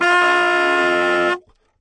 Tenor Sax f3
The format is ready to use in sampletank but obviously can be imported to other samplers. The collection includes multiple articulations for a realistic performance.
jazz, sampled-instruments, sax, saxophone, tenor-sax, vst, woodwind